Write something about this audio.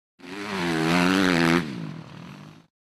250f honda motorcycle going by.